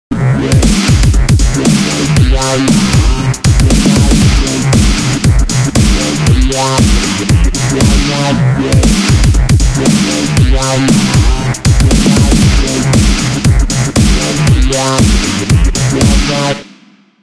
Likrakai Template 04
Here's a few loops from my newest track Likrakai! It will get filthier and filthier....i promise ;)
bass, drum, filthy, hat, kick, loop, mastering, snare, synth